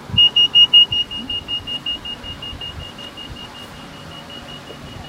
A pedestrian crossing near a supermarket in Hereford, UK. It starts bleeping to tell us to cross the road. As I walk across the road the bleeper becomes fainter until it stops sounding.
beep, beeps, bleep, bleeper, bleeps, buzzer, crossing, field-recording, pedestrian, pedestrian-crossing